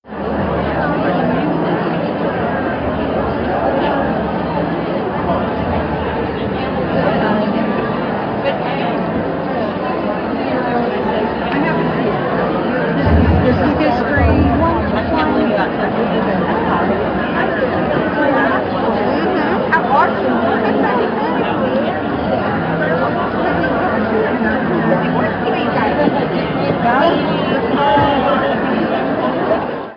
audience- war memorial theater SF 2017
Recording of a audience before a performance. 2017- recorded by phone.
atmophere, audience, field, recording